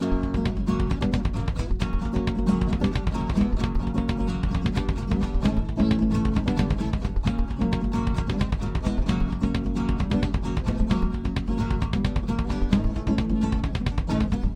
guitar + drum for a fight song
drum, guitare, guitar